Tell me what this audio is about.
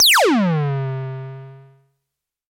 EH CRASH DRUM60
electro harmonix crash drum